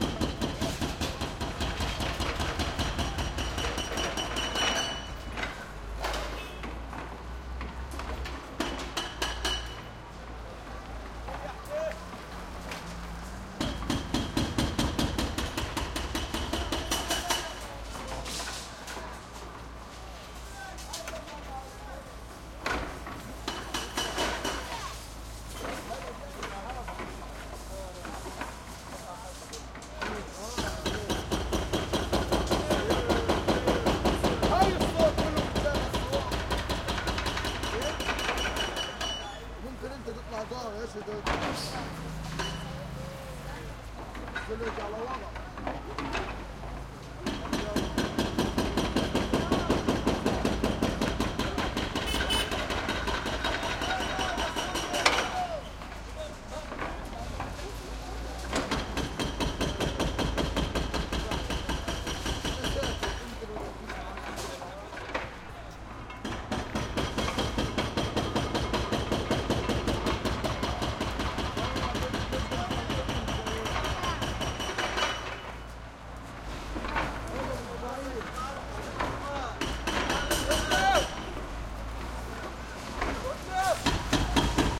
construction site pile driver rubble nearby arabic voices1 Gaza 2016
site, piledriver, construction, pile, driver, rubble